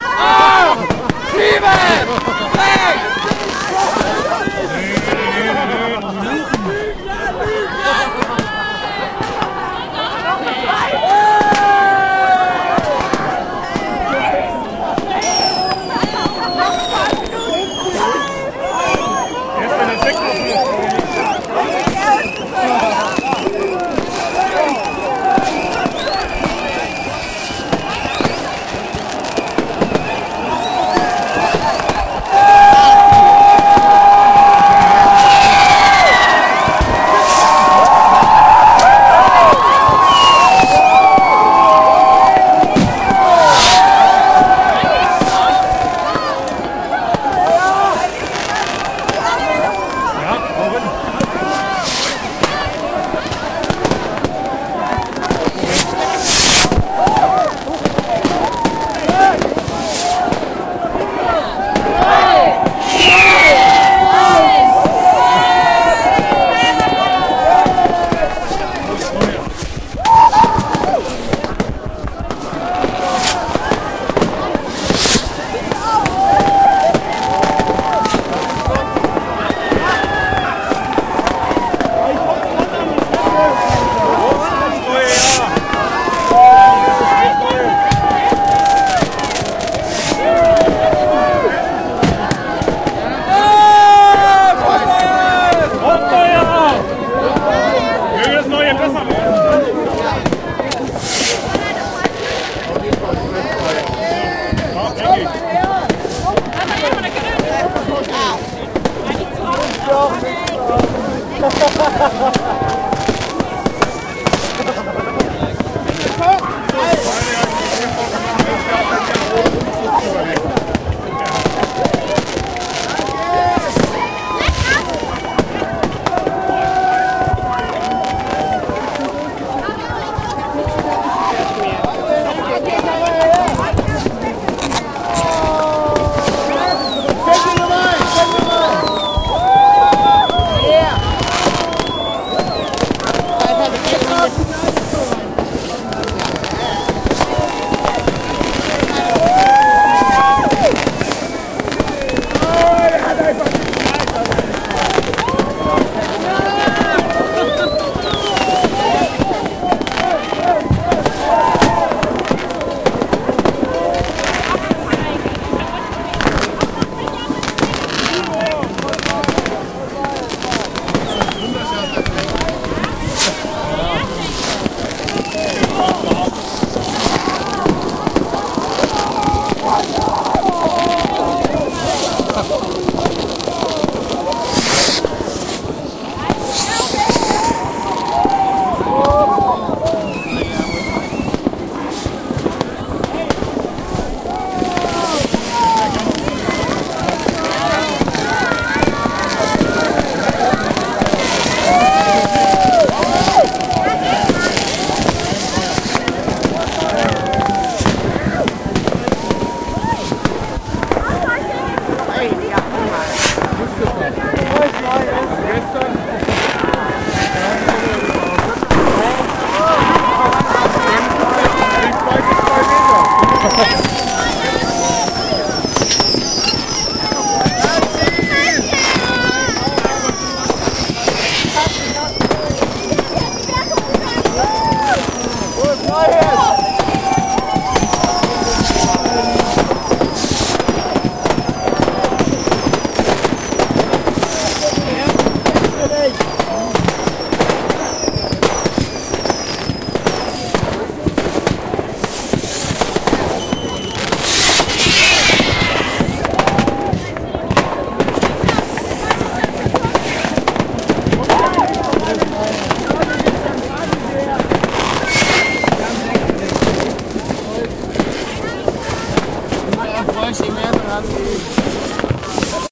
New Year's eve 2010 in Kreuzberg, Berlin. Fireworks flying about, exploding in trees making the snow drop on top of the Zoom H2. Very lively, lots of young people bringing crackers and champagne and having a good time in the freezing cold.